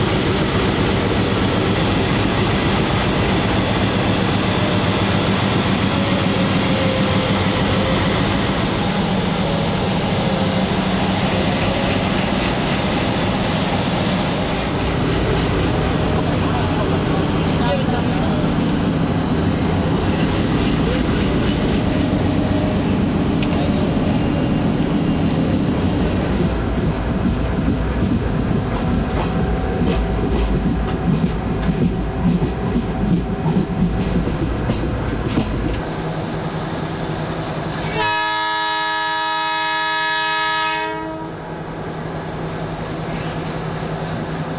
India Train Ride 2 (Diesel Engine, sounds inside while riding, Whistle)
India, sounds from inside a train while on the way. You hear the diesel engine, the usual rattling, and the whistle from the locomotive.
Diesel, Engine, India, inside, Locomotive, Motor, Public, rattling, Ride, sounds, Train, Travel, Whistle